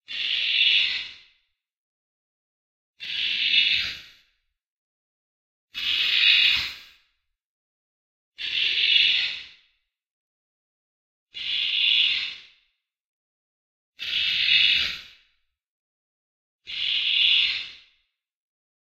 Several Monster Screeching One Shots. Created using a time modulated vocal sample in Kontakt 5 and Cubase 7, layered with minor delay, reverb and extensive ring modulation.
Monster, Animal, Creature, Beast